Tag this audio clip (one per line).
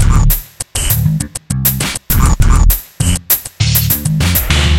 electronic
grunge
instrumental
loop